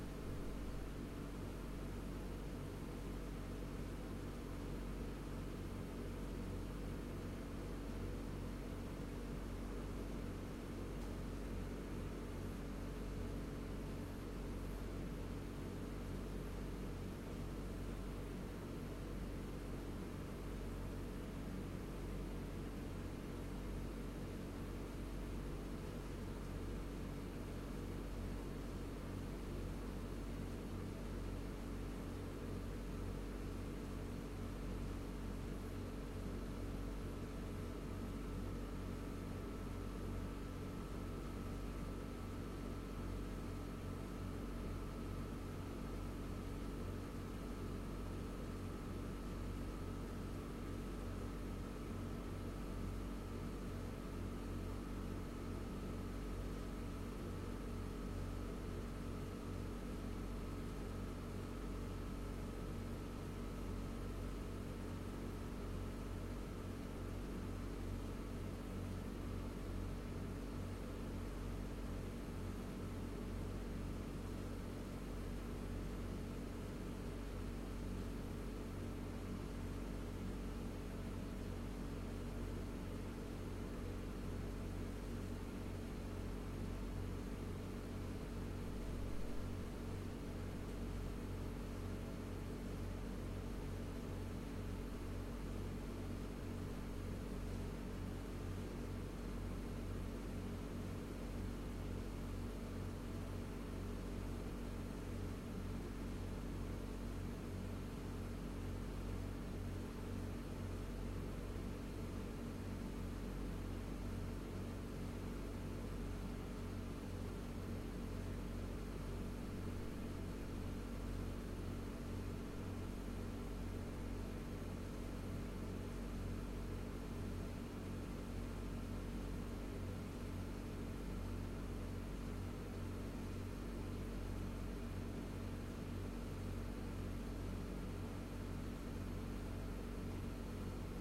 Small Room Tone Vintage Air Condition AT
Room tone of a small room with vintage air conditioner (Those on the wall...)
Day Time, Noon,
The Windows were closed
still you can hear some distance birds...
Recorded with Audio Technica Mic AT4060
room, small, roomtone, air, condition, tones